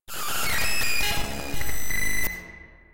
Made this with raw data loaded into audacity and some effects
sounddesign, noise, sound-design, lo-fi, strange, scream, weird, glitch, abstract